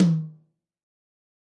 Breathing Tom 1
This is a free one hit sampler of my "Breathing" drum kit samples. Created for one of my video tutorials.
drum, tom, samples, Breathing